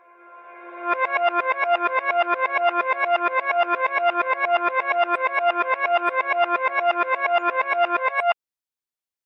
Insomniac Snyth Loop Rev
Simple reversed arpeggiated pluck synth loop, from an old track of mine.
[Key: F Minor]
[BPM: 128]
arp, arpeggiated, arpeggio, Chill, Dance, dark, EDM, electro, electronic, Hip-hop, loop, Man, mellow, minimal, pluck, plucky, Rev, Reverse, simple, synth, Synth-Loop, techno, Test, Test-Man, Trap